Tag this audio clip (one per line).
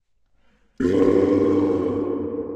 Dark
Evil
Horror
Jumpscare
Monster
Roar
Scary
Scream